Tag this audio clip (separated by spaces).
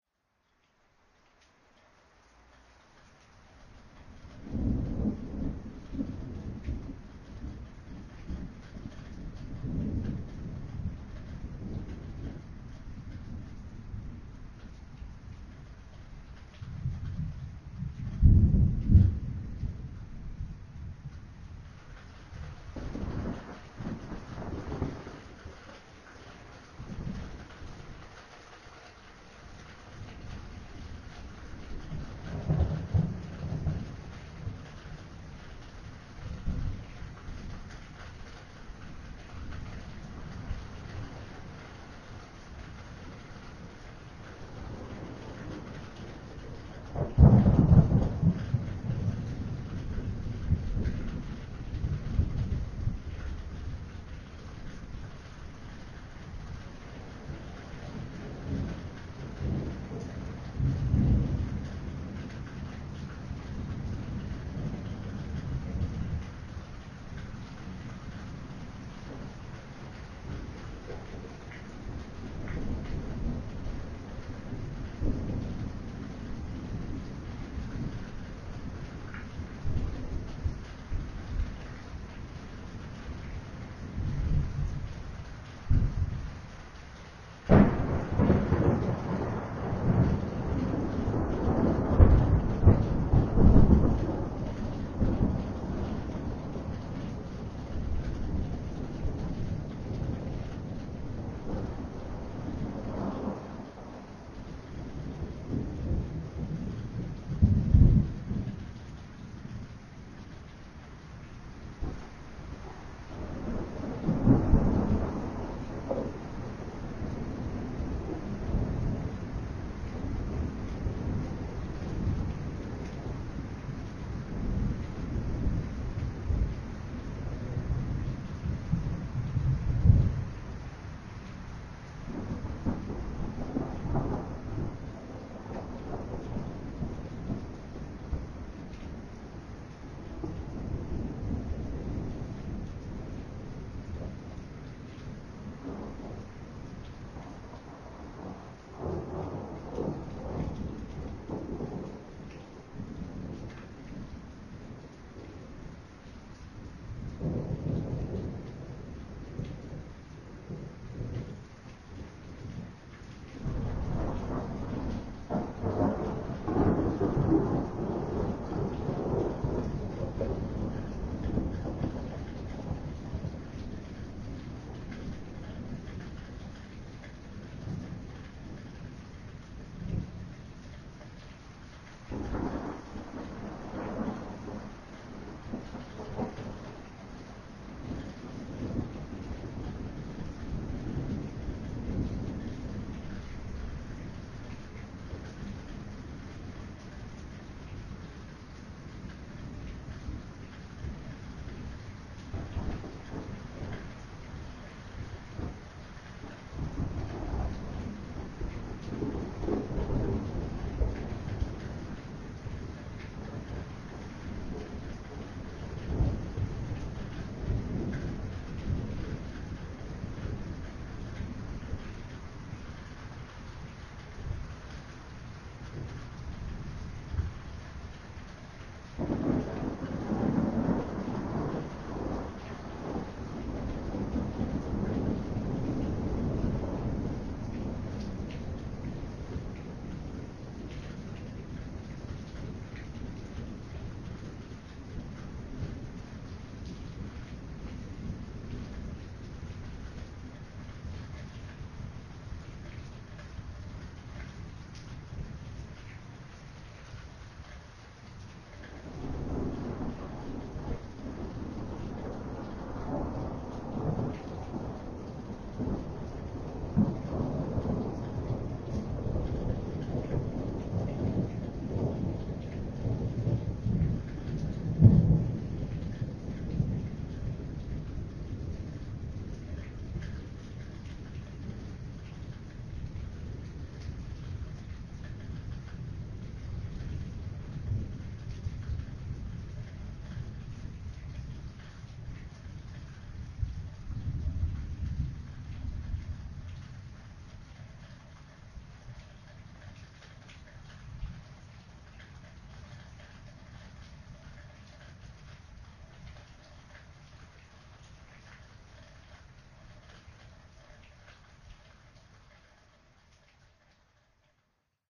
STORM THUNDER RAIN